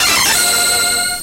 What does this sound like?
vintage video game logo chime
Bashing on a keyboard + direct line-in to a shoebox tape recorder = something resembling the distorted jingle that might have accompanied a game developer's logo, from the startup/intro to some obscure old video game that doesn't exist.
Circa 2009.
noise, megadrive, 8-bit, 8bit